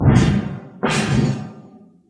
tin plate trembling
plate, tin